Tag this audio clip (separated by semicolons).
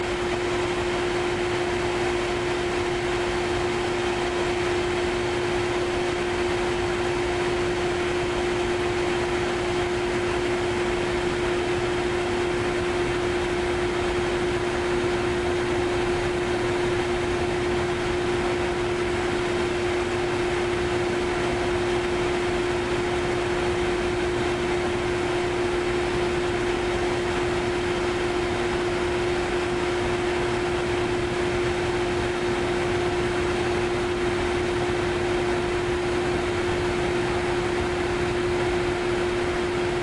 Motor; Distiller